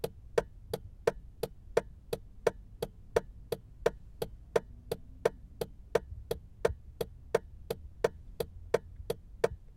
Car indicator of changing direction
arrow, blinking, car, clicking, indicator